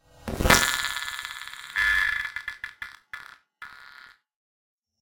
Attacks and Decays - Single Hit 5
Very similar to "Single Hit 3" with the AM frequency modulated. Band-pass like timbre with very short attack followed by long processed decay tail. Very slow AM (sub-audio frequency) applied throughout.
electronic, experimental, hit, sfx, spectral, synthetic